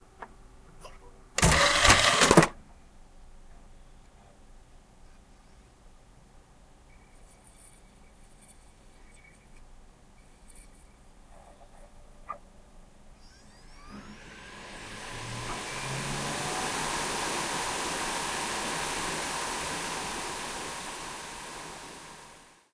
into drive1
disk, drive, dvd, cd, tray, data, disc, computer, pc